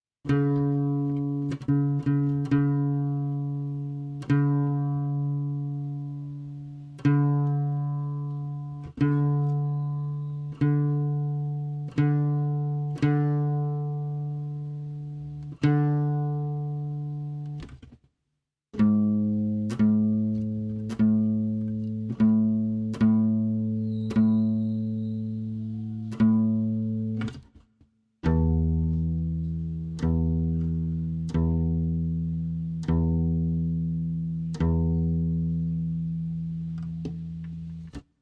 Acoustic nylon strings guitar tuning.
Recorded with a single Behringer C-2 mic.
string, spanish, acoustic, strings, nylon, guitar, tune, tuning